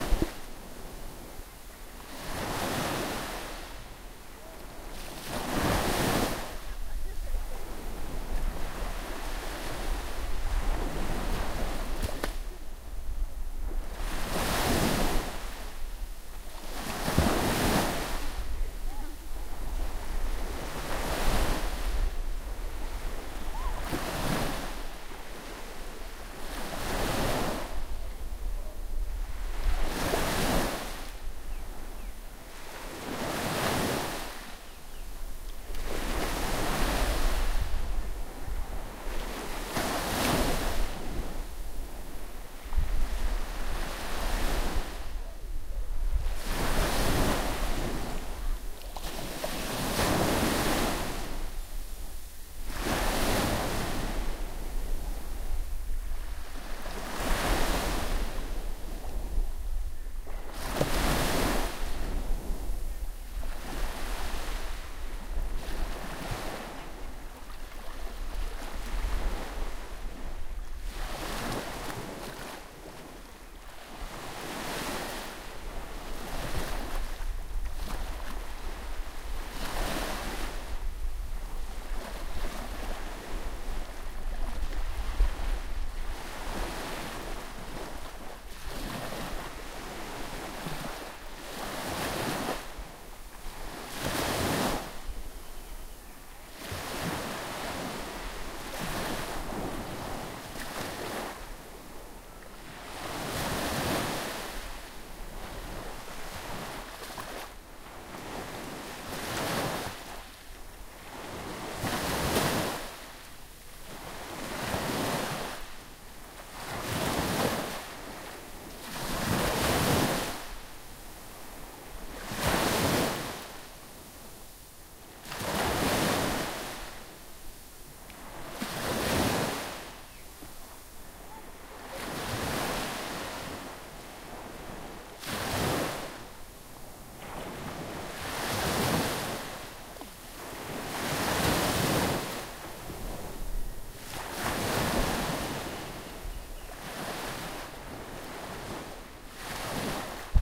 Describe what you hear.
Thai Island Beach II
A longer recording of the waves lapping at the sandy shoreline.
tropical, relaxing, nature, waves, seafoam, ocean